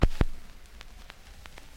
The sound of a stylus hitting the surface of a record, and then fitting into the groove.
needle-drop, record, noise